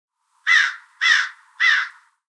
Crow Call, Single, A
Audio of a crow in Florida. Highly edited and EQ'd to remove the background ambience.
An example of how you might credit is by putting this in the description/credits:
The sound was recorded using a "Zoom H1 recorder" on 26th July 2017.